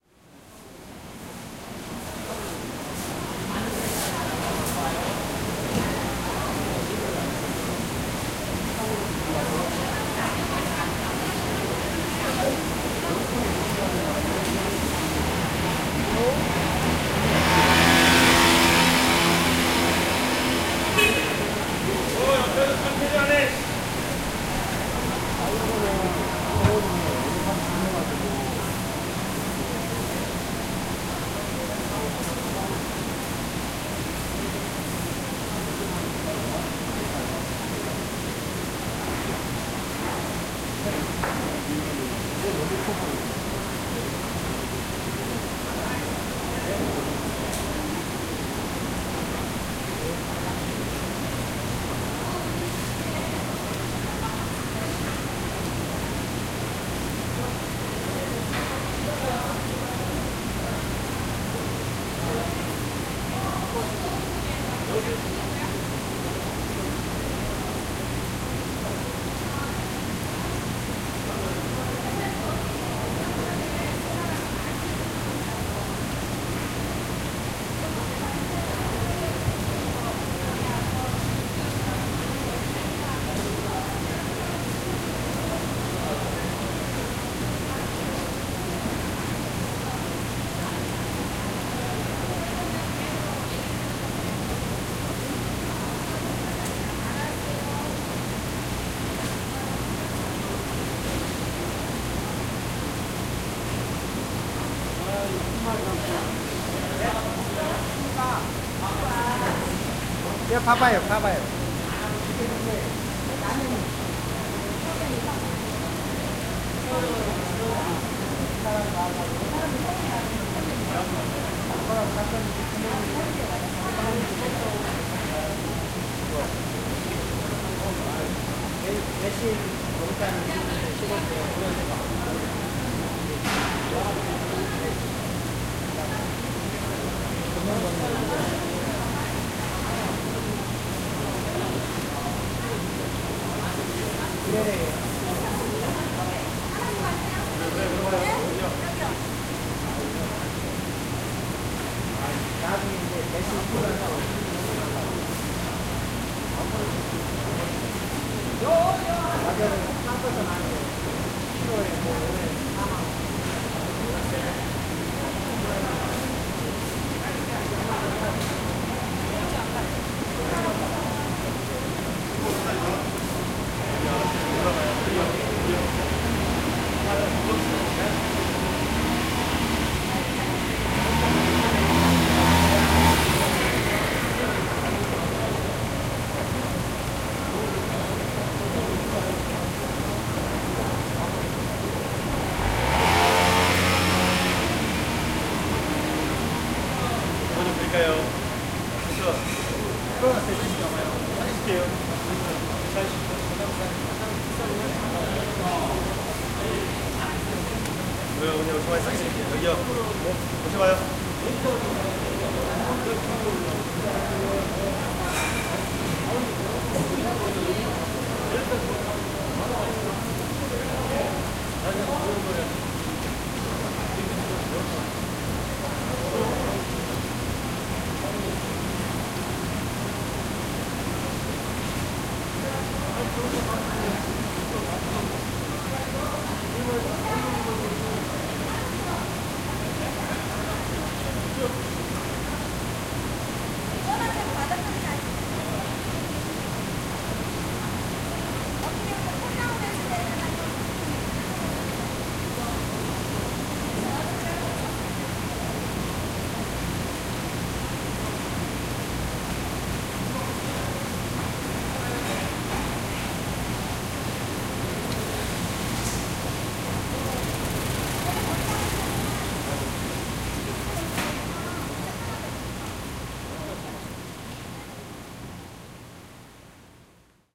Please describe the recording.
People talking in Korean, motorbike, water. At Noryangjin Fish Market.
20120718